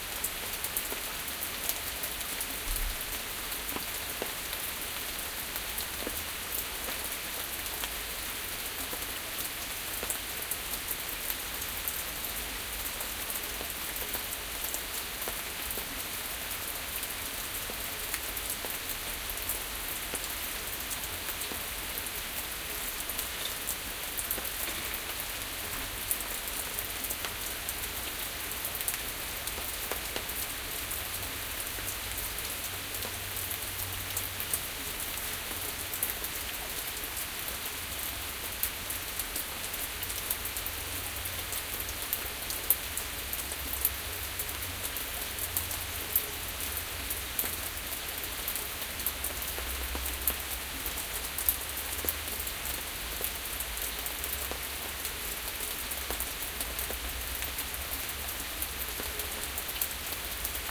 A rainy day in Basel so i put my recorder on the balcony. Sennheiser 8020 omnis in a usbpre2/Marantz PMD661 (digital in).
rain, water, weather, wet